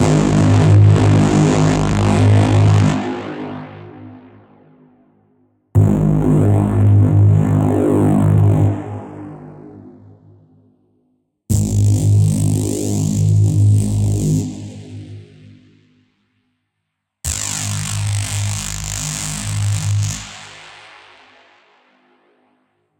I love notch filters, so, this is a evidence. A hard distorted reese with different cutoff values for each hit.
reese, dark, hard, distortion, notch, flanger